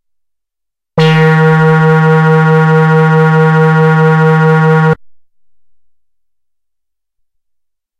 SW-PB-bass1-Eb3
This is the first of five multi-sampled Little Phatty's bass sounds.
moog, fat, envelope, analog, bass, synthesizer, little, phatty